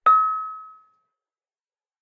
talempong pacik 05
Traditional musical instrument from West Sumatra, a small kettle gong played by hitting the boss in its centre
pacik, minang, bells, sumatra, talempong